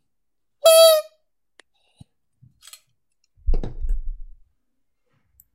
Horn for bikes
bicycle, bike-horn, horn